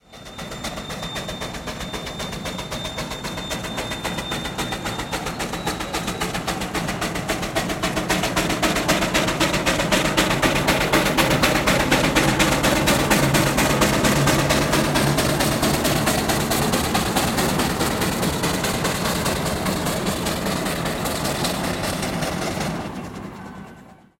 Passing Steam Traction Engine 2
Vintage British Steam Traction Engine
Engine, Steam, Traction-engine, Tractor